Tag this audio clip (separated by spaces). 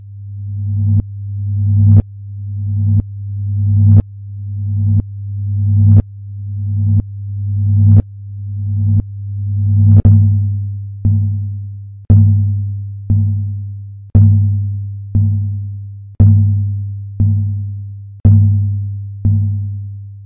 load loading screen sound